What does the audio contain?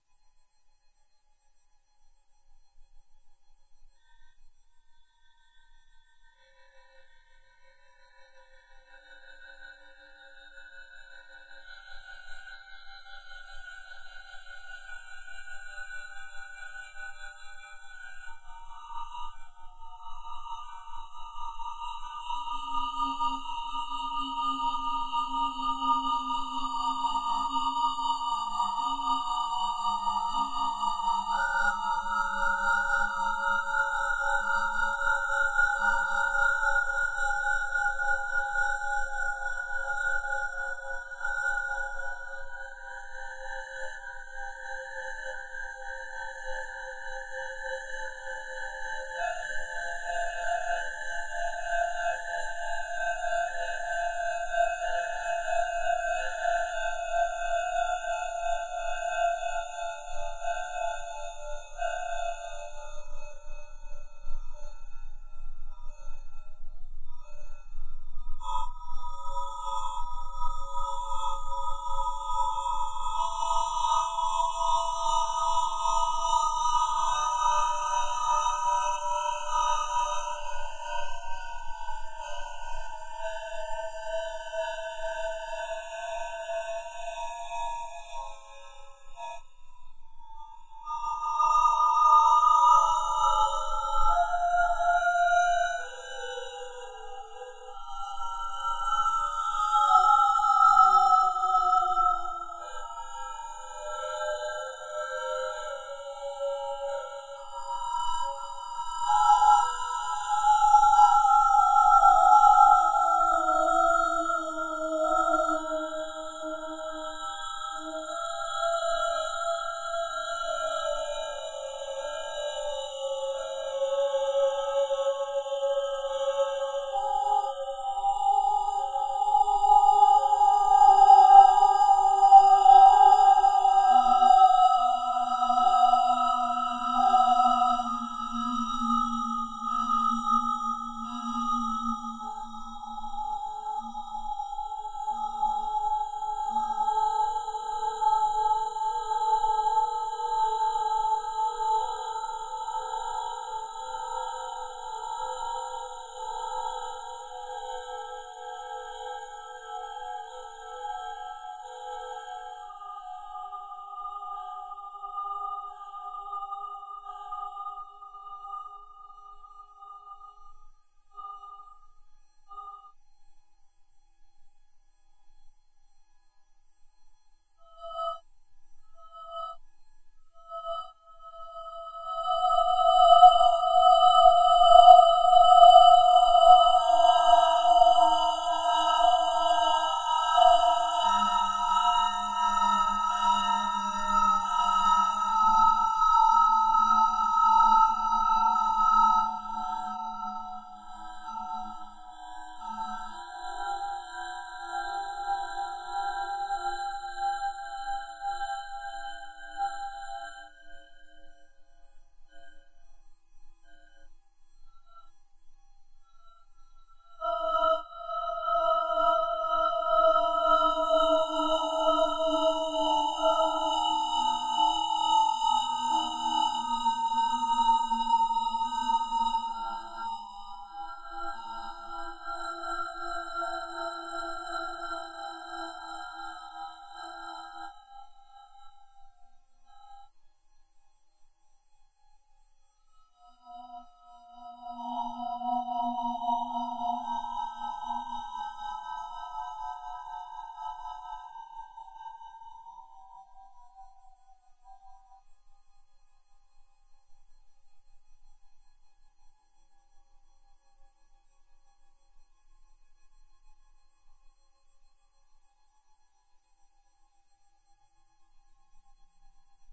epanody fb-gp001
derived-work, electroacoustic, experimental